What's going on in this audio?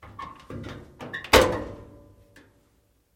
Appliance-Clothes Dryer-Door-Open-01
The sound of a clothes dryer door being open.
This file has been normalized and background noise removed. No other processing has been done.